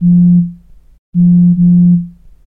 Cellphone-Vibration-on-sound-insulating-foam-padding mono
Recording of a vibrating iPhone 4S on a sound-insulating foam padding. Neumann KMi84, Fostex FR2.
Text-Message, Mobile-Phone, Cell-Phone, Vinration-Alarm, Vibration